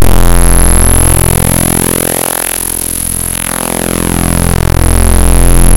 Sample made by importing non-audio files (.exe, .dll, etc.) into Audacity as raw data. This creates a waveform whose duration depends on its file size. Zooming in, it's very easy to find bits of data that look different than the usual static. This sample was one of those bits of data.
audacity, chiptune, distorted, bass, glitch, chip, clipped, reese-bass